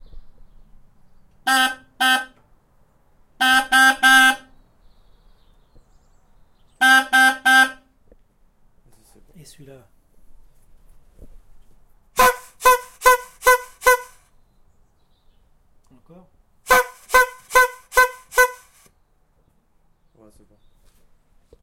My grandfather's Citroen Torpedo 1925 klaxon/horn
twenties, klaxon, citroen, 20s, horn, vintage